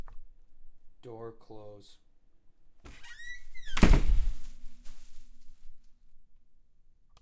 door being shut